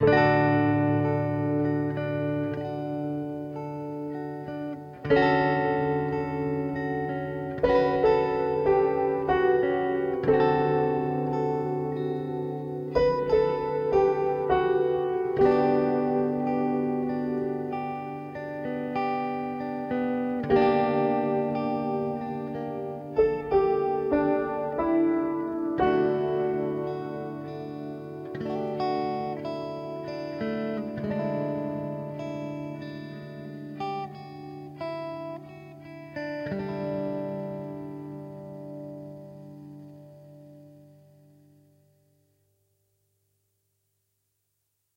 Orphan School Creek (outro)
Drenched in some nice cathedral reverb.
cinematic, electric-guitar, film, finale, melancholic, movie, outro, piano, reverb, sad, slow